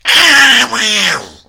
Duck dies
A sound duck makes when you kill it.
dies, duck, quack, sound